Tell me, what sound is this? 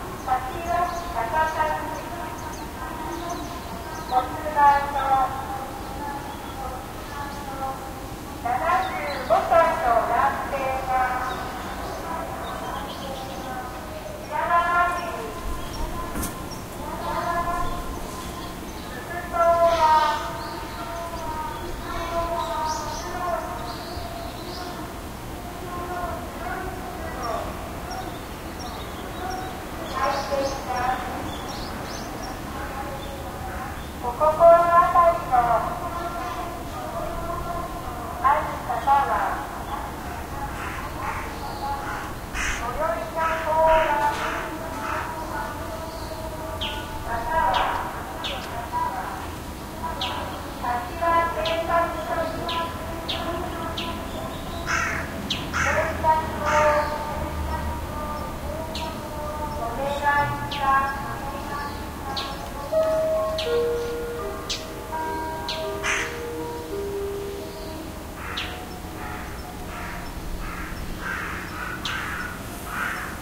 Japan Kashiwa Speaker Broadcast in a Rural Town with Birds
In the town of Kashiwa I heard something broadcasted through the public outdoors speaker system.